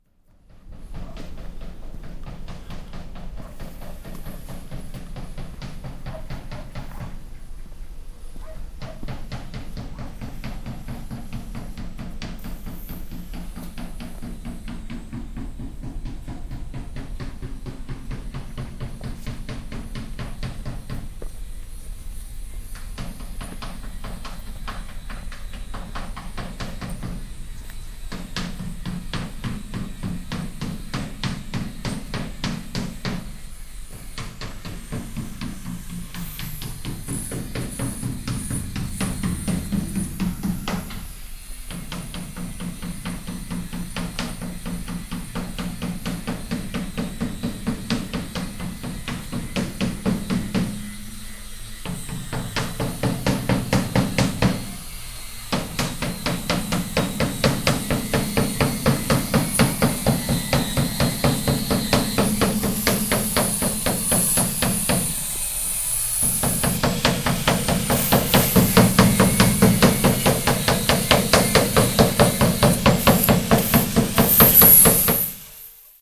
Bootje bikken 1
Two friends are removing the rust from an iron boat with a hammer and a kind of sanding machine. I'm approaching them with my Edirol R-09 recording the noise they make.
nature, field-recording, noise, tools, machine, percussive, work